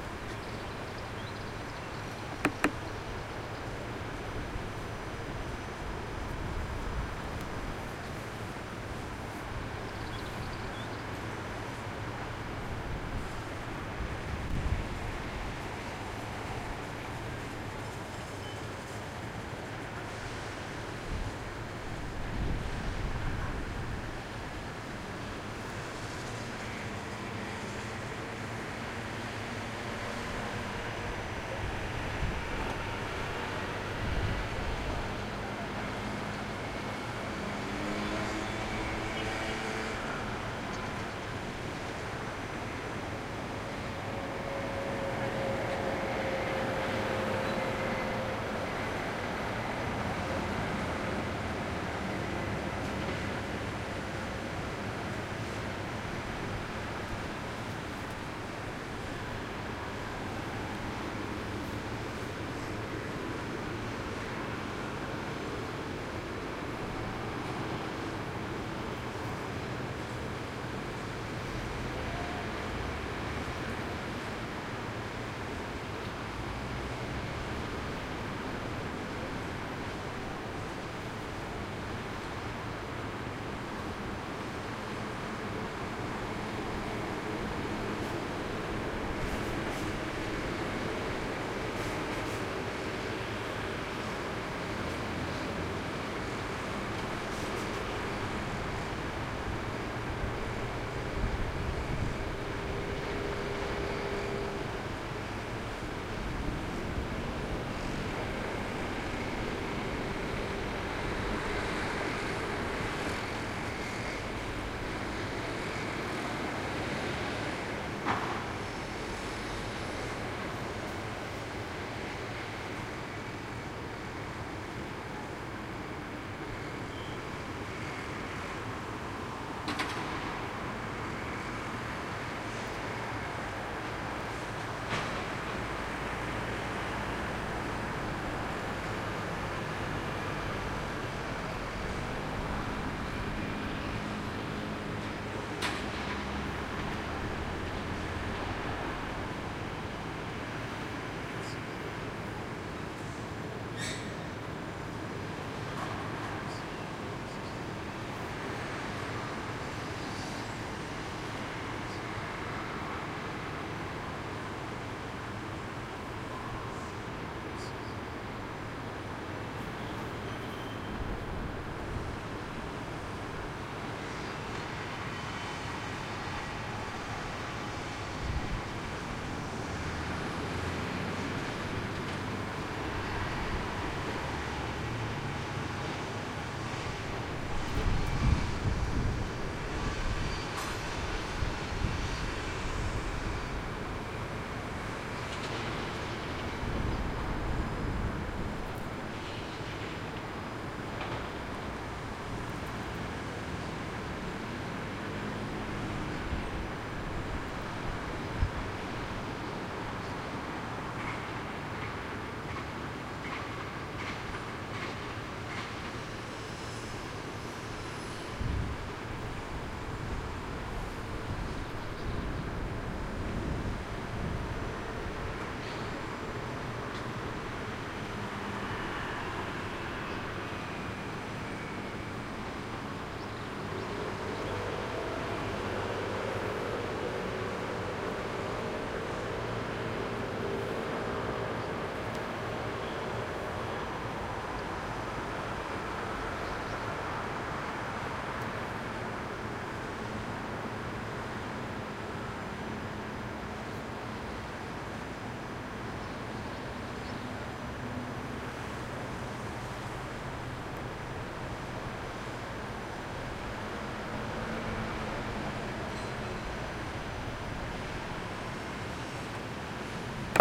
Field Recording at Terrace on Barcelona
Field Recording on a summer day at Barcelona City terrace. ROLAND R26 + 2 RØDE matched mics.